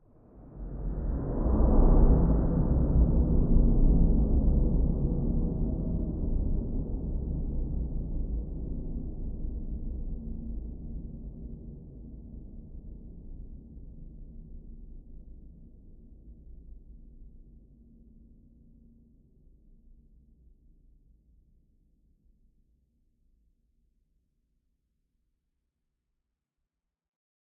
Alien Planet 2
lo-fi, planet, fx, alien, abstract, future, sounddesign, sound-design, organic, soundeffect, sfx, strange, sci-fi